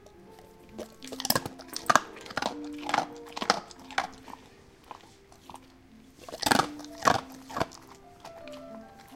Igor19B-crunch crunch
A recording of my Alaskan Malamute, Igor, while he is chewing some dry kibble. Recorded with a Zoom H2 in my kitchen, using the internal mics at close range.
eating, malamute, dog, husky, crunch, chewing